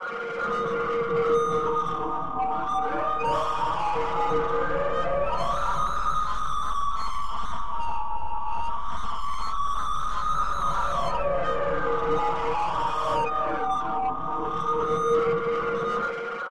Merge Clip 5
C add 9 b5 chord played through a Journeys synth using Bell Saw Massacre voice at C3 for six seconds. Converted to audio file, copied, copy reversed and two files merged with automatic crossfade. Now 16 and a half seconds long.
grinding, Scream